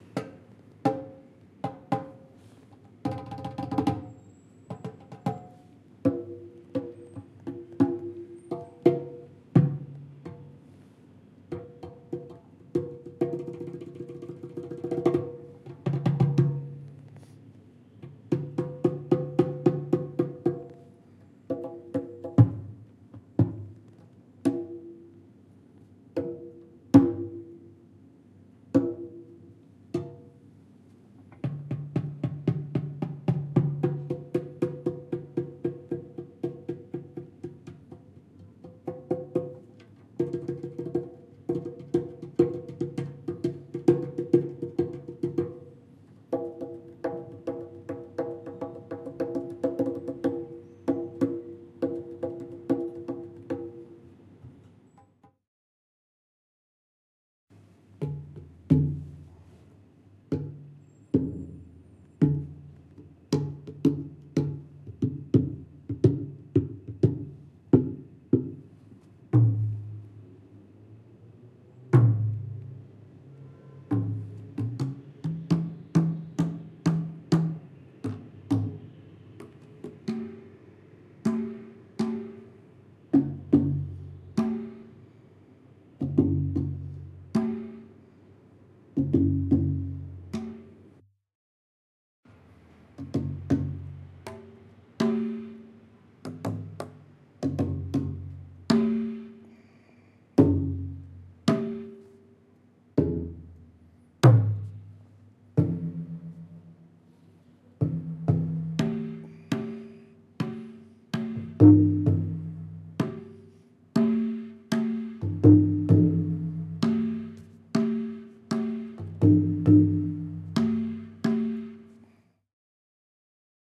Playing various Asian drums in a Tokyo Museum in May 2008 on a Zoom H4. Some single hits but mainly rhythms. Light eq and compression added in Ableton Live.